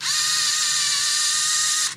MinoltaV300Zoom3
Zooming the lens/focusing on a Minolta Vectis-300 APS film camera. There are several different sounds in this series, some clicks, some zoom noises.
minolta, APS, minolta-vectis, film, camera, photography